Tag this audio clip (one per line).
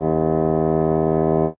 classical
fagott
wind